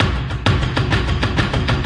taiko loop created in LMMS
drum-loop, taiko, taiko-loop